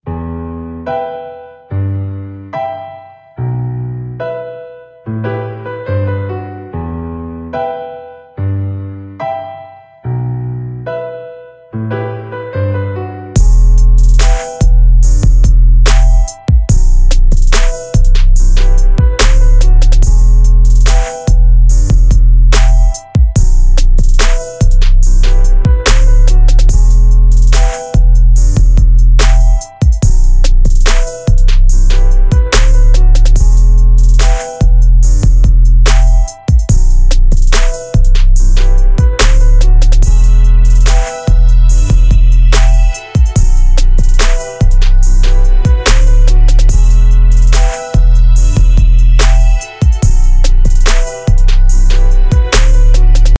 Dre style rap loop
dr, dre, gangster, hip, hip-hop, hiphop, hop, loop, rap